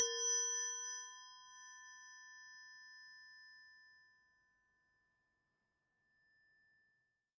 Wrench hit A#3
Recorded with DPA 4021.
A chrome wrench/spanner tuned to a A#4.
harmonics, tonal, hit, metal, percussive, spanner, dissonant, ring, high, chrome, Wrench